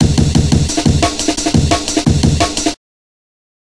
14 ca amen
chopped amen break semitone down